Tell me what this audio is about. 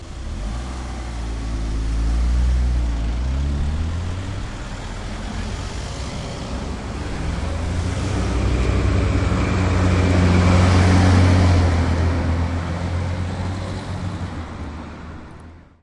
traffic at green light
traffic moving after a red light switches to green
car, traffic, truck